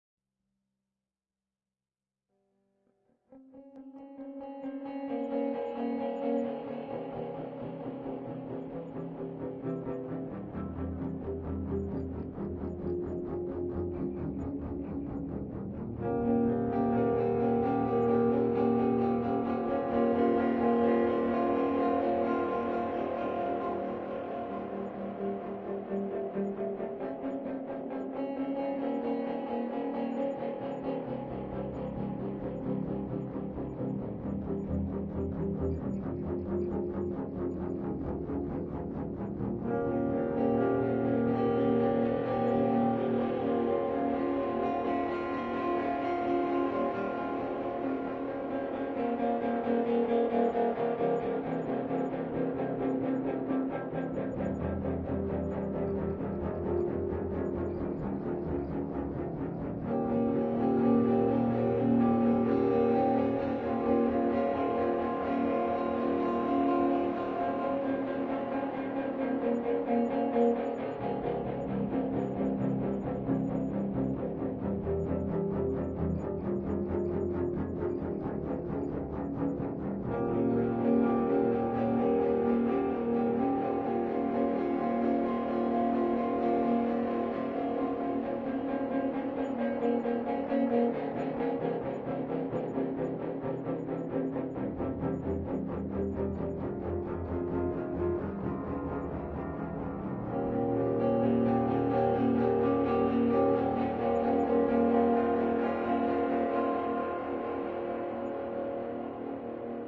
Clean guitar through an FMR RNP processed through Reaktor 5.
Sounds like NIN's "Help Me I am in Hell"
ambient, atomospheric, guitar